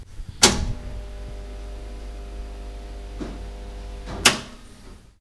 Ceramics kiln start and stop, with a couple of unwanted stomps.